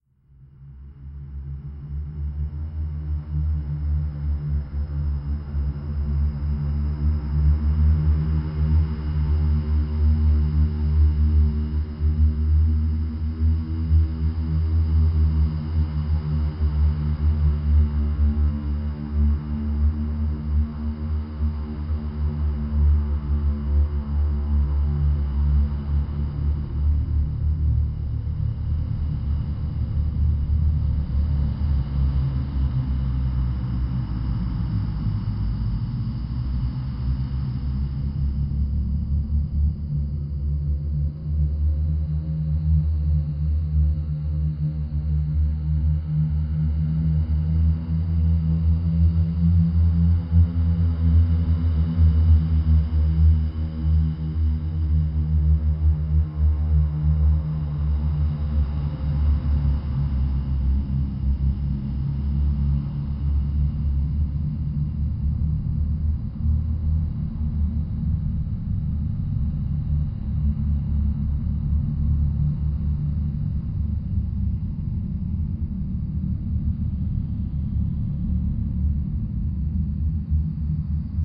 Spooky humming sound. Recorded with my Blue Yeti, EQ'd, and paulstretched in Audacity.
anxious, creepy, haunted, humming, nightmare, scary, sinister, spooky, suspense